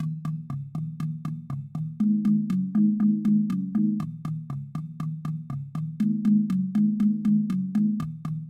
Muster Loop 2
120bpm. Created with Reason 7